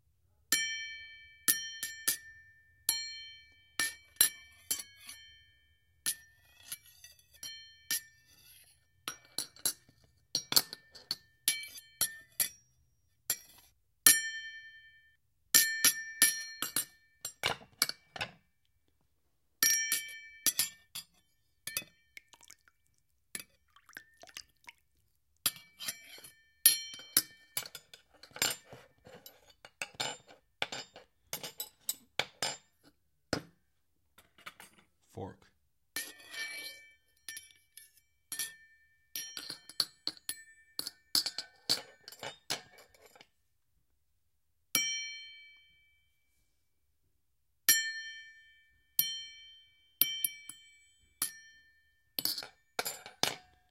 Various utensils clinking on wine glasses. Great for layering.